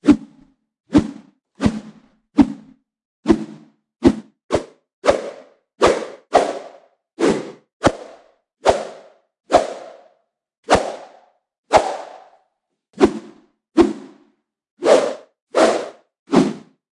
Combat Whoosh 2021
bamboo, combat, stick, fight, whoosh, woosh, attack